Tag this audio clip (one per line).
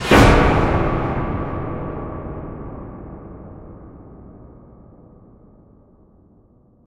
anger
blood
disonances
knife
shock
slice
terror
vengeance